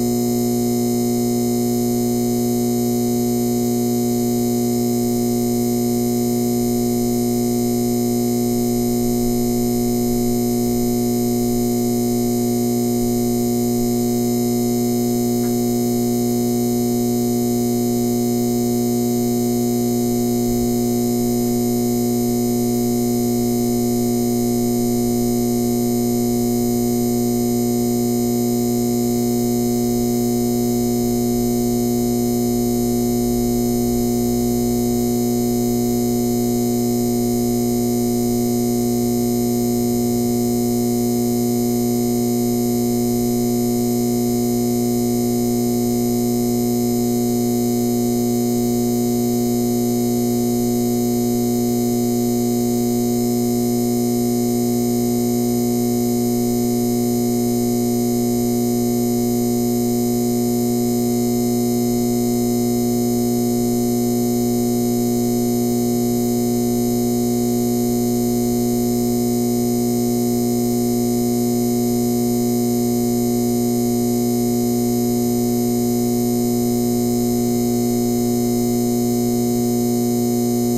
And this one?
neon tube fluorescent light hum cu1
hum, neon, light, fluorescent, tube